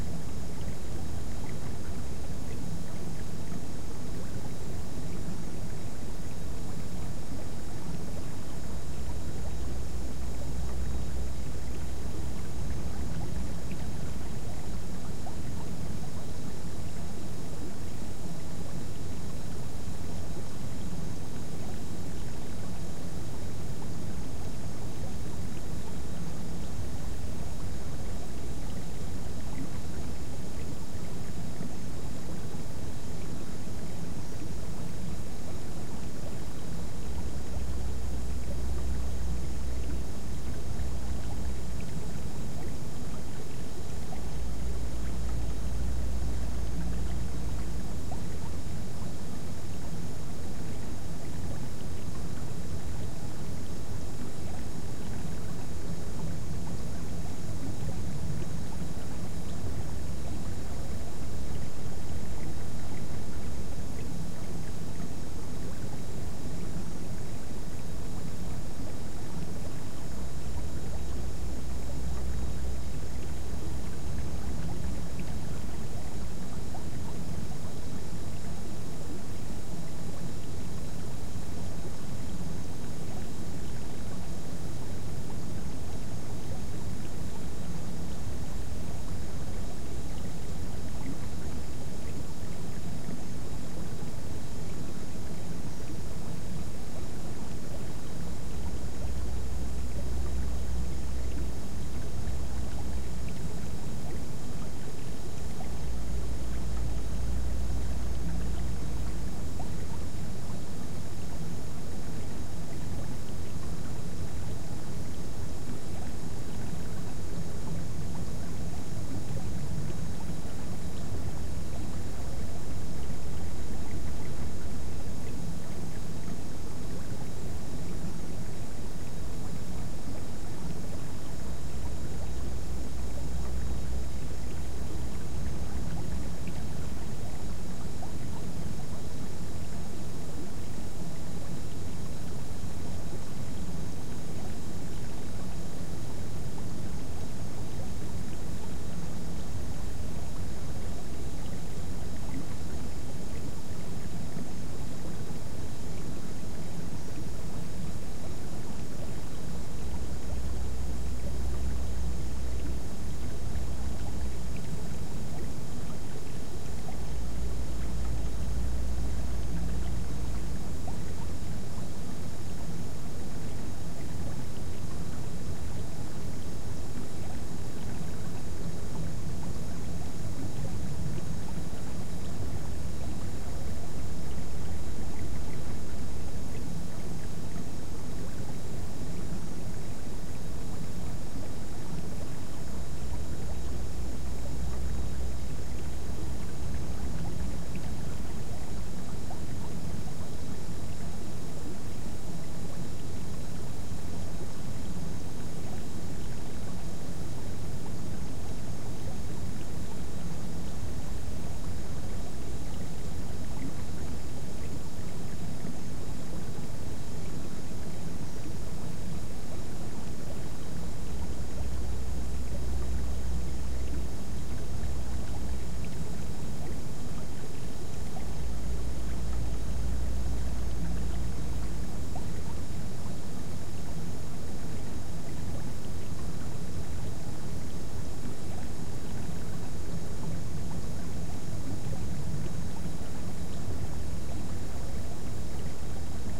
Submarine internal
designed 5.1 ambience of the cockpit of a small research submarine cruising submerged. featuring small engine noises, electric ventilation and the steady gurgle of water passing the hull